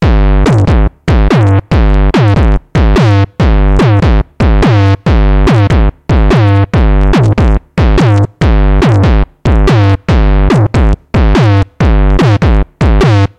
A distorted kick bass drum loop made with M-Audio Venom and analog subtractive synthesis. Only distortion used as effect in the synthesizer.
Recorded with Sony Sound Forge 10.